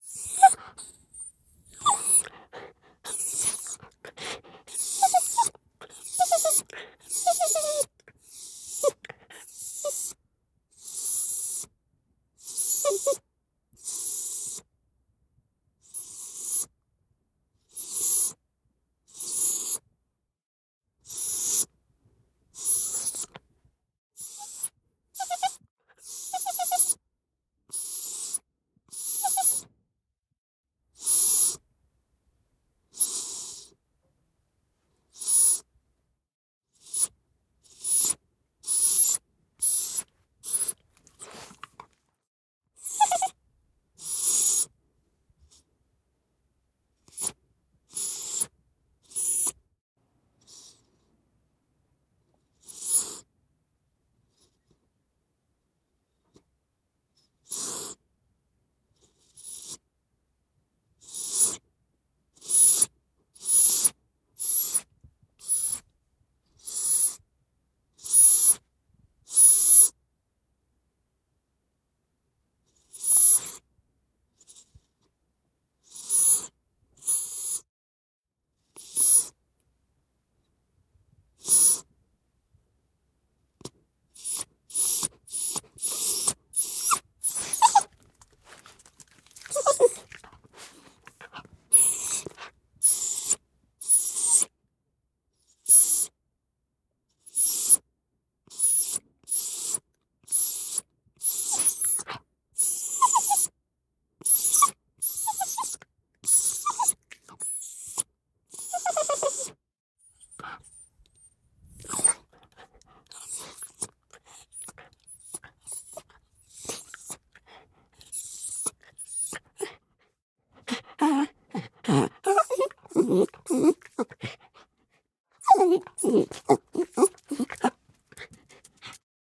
My dog Loba (mix of yorkshire and schnauzer). Recorded in Protools + Roland Quad Capture + AKG CK93 mic